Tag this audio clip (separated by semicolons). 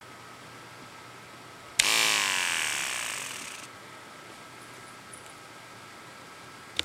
low; battery; shaver